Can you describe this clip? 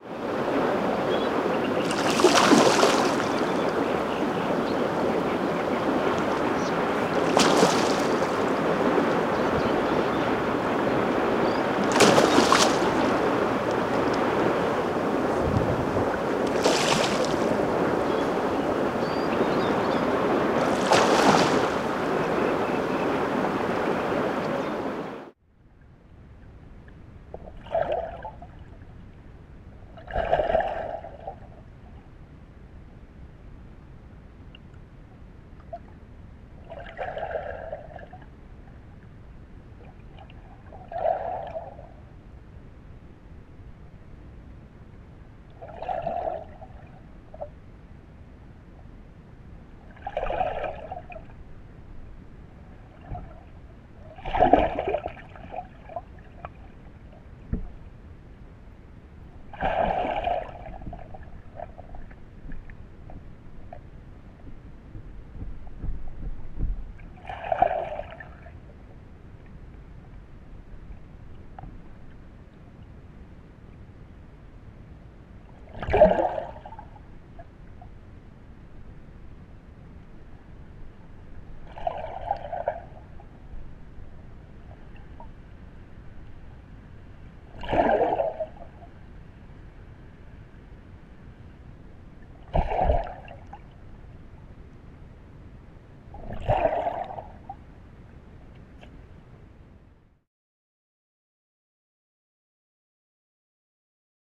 Asp attacks riverside and underwater
The predator asp hunting bleaks in the river.
Riverside recorded with the mic of a Panasonic handycam.
Underwater recorded with the mic of a GoPro Hero 3+ cam.
asp, attack, fish, splash, water